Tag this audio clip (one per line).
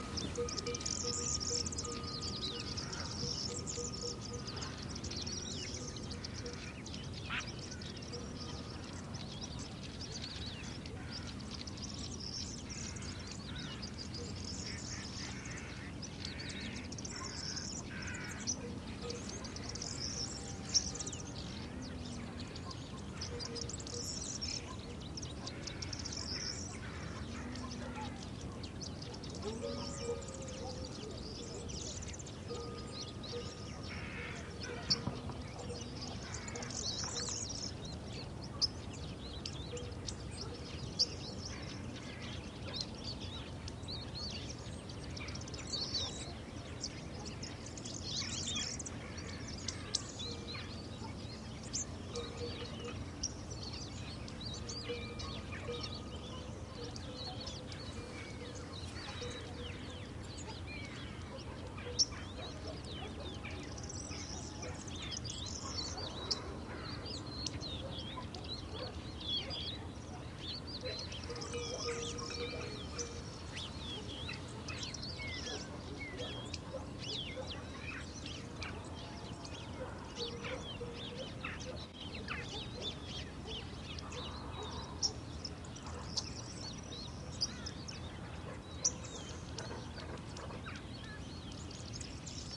ambiance
autumn
birds
cowbells
nature
sparrows